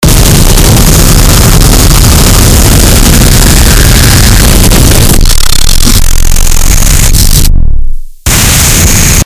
This is a very intense sound of being inside a tornado. Made with over 10 different sounds in FL Studio.